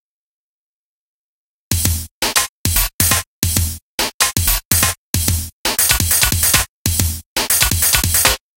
beat that is spacked out
beat, spackedout